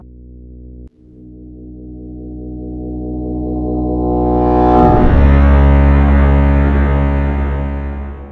movie logon
This is a short motif typical of the sound heard at
the beginning of any movie when the movie studio's
logo is shown. Made with Audacity's pluck rendering.
cinema, dramatic, logo, movie